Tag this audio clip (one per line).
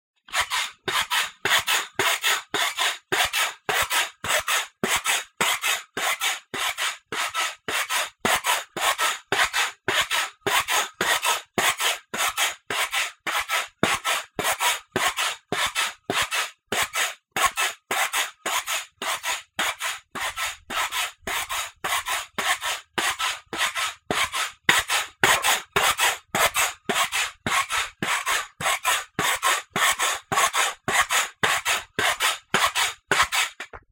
boing
bounce
pogo
spring
stick